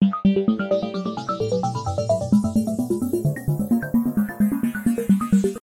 Techno computer sound for games or other things :)
electro bass techno emotional